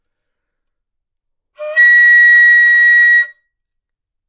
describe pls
Flute - Asharp5 - bad-attack
Part of the Good-sounds dataset of monophonic instrumental sounds.
instrument::flute
note::Asharp
octave::5
midi note::70
good-sounds-id::3093
Intentionally played as an example of bad-attack